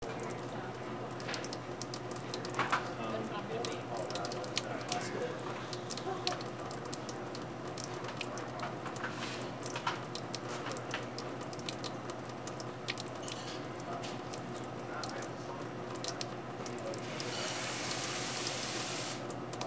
water in faucet
This is a recording of water pouring into a sink at the Folsom St. Coffee Co. in Boulder, Colorado.
faucet, water